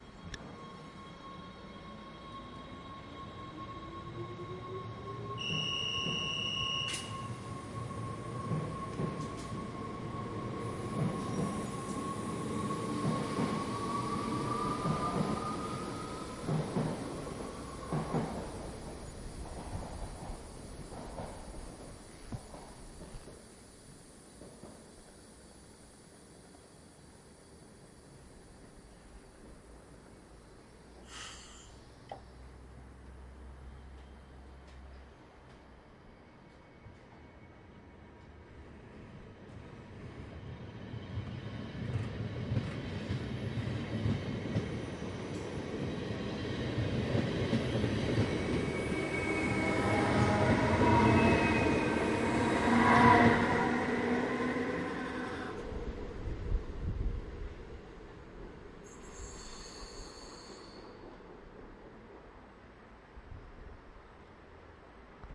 Two electric trains departing
two dutch trains departing after each other.
Field recording at Zwolle station Netherlands.
Recorded with a Zoom H1 recorder.